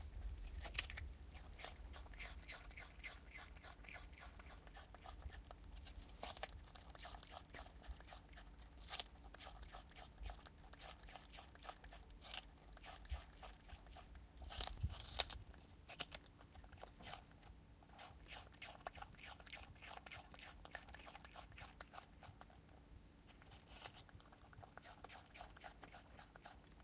Trichosurus vulpecula Eating
Brushtail possum (Trichosurus vulpecula) eats an apple.